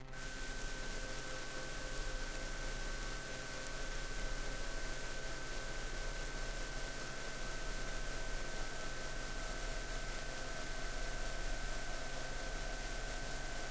A few high quality ambient/space sounds to start.